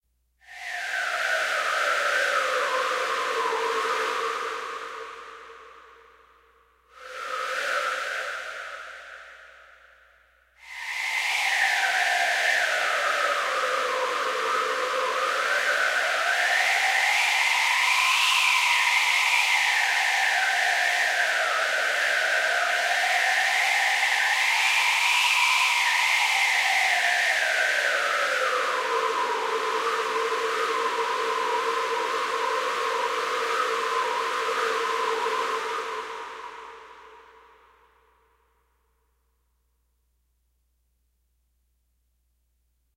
Desert wind stereo
Desert, stereo, wind